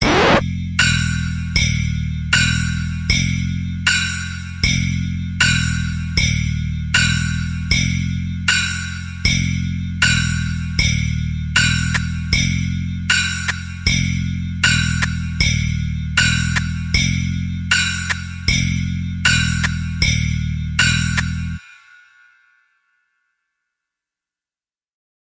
A song i made in fruity loops